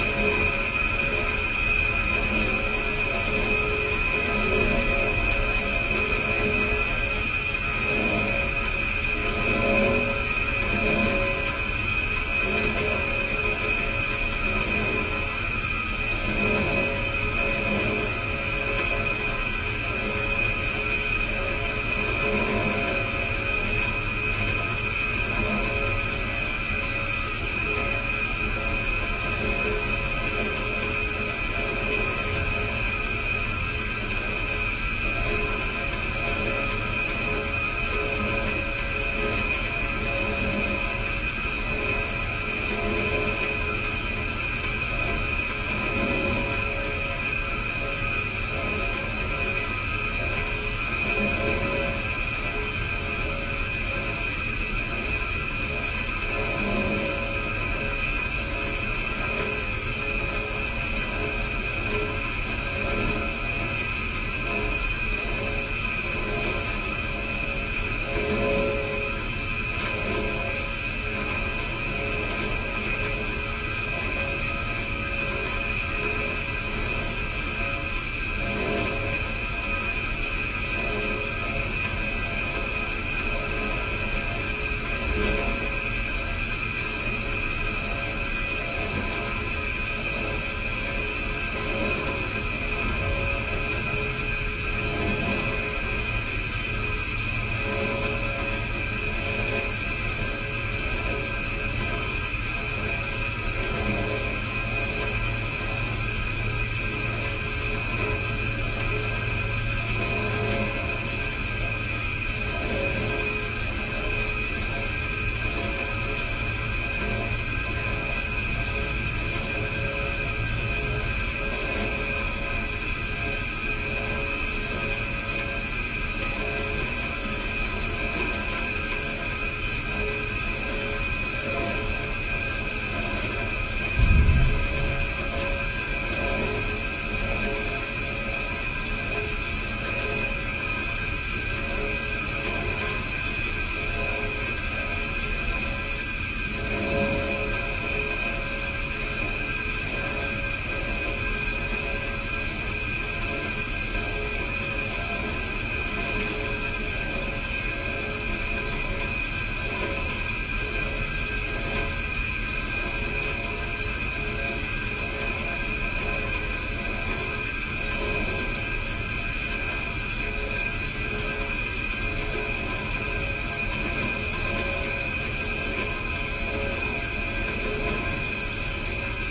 ambient noise (radiator)
A mono recording of an incessant radiator that made a lot of noise during a recording session. Rather that simply wait it out, I chose to record the annoying sound. No processing; this sound was designed as source material for another project.
ambience, hissing